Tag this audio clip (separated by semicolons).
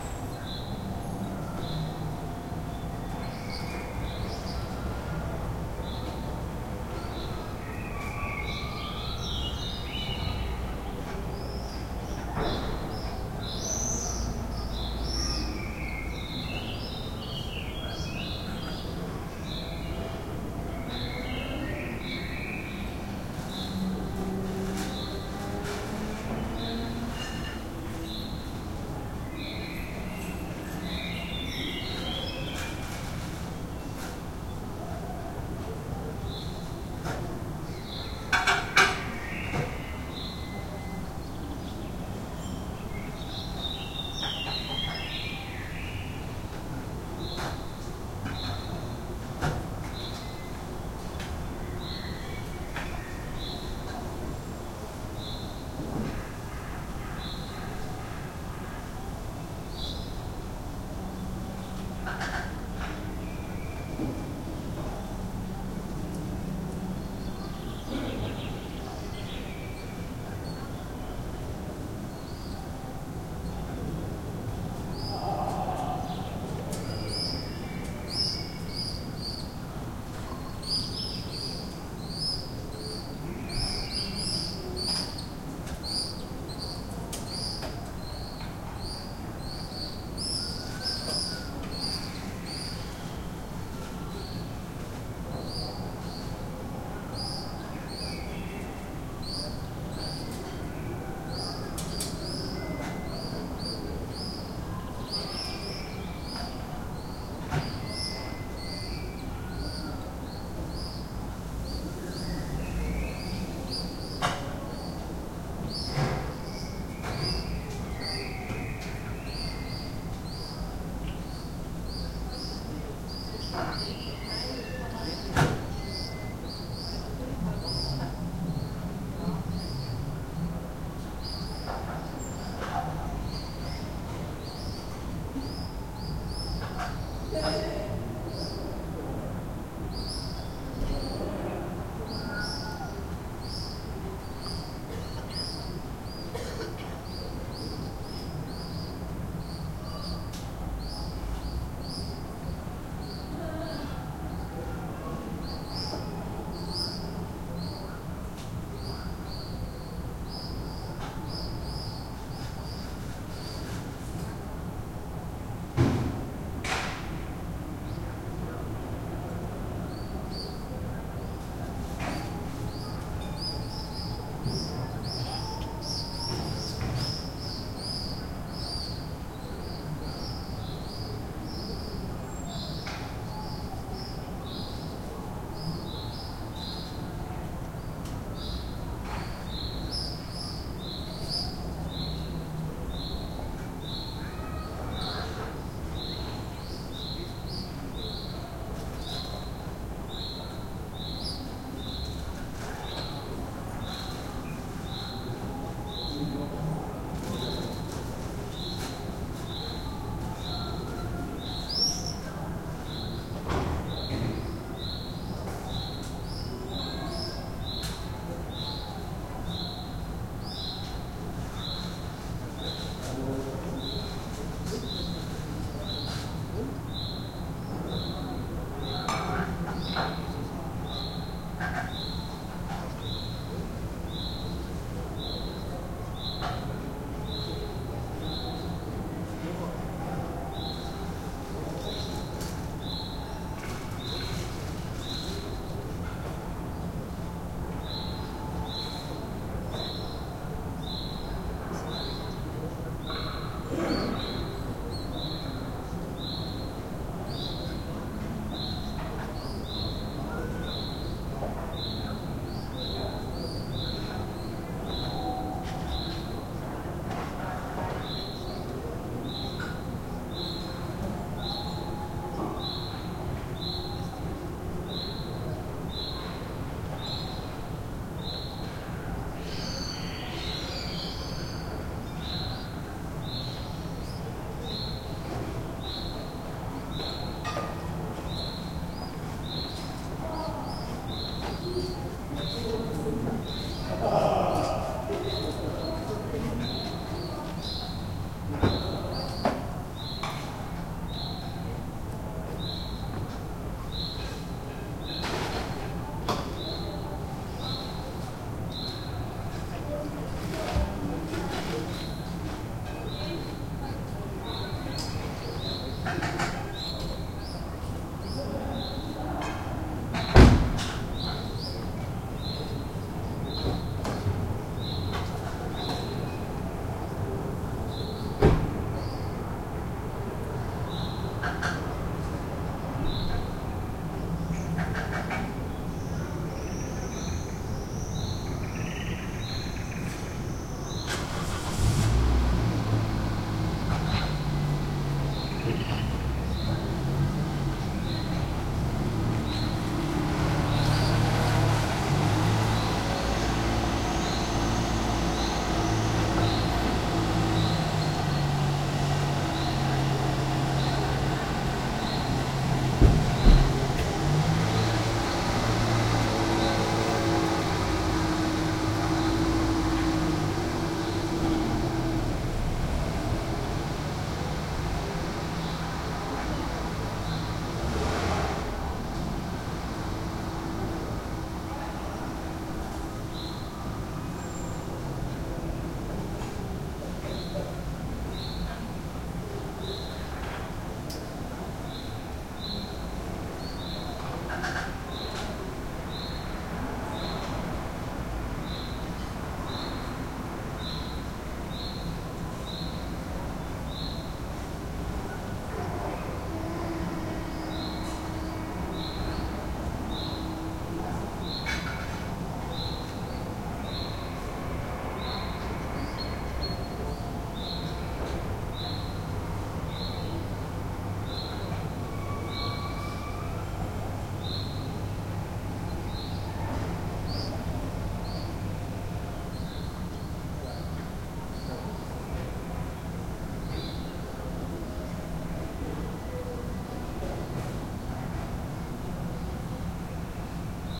swallows; crows; soundscape; evening; domestic; people; children; noise; urban; home; peaceful